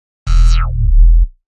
sub bomb
i made this sound with excellent Kontakt instrument The Pulse.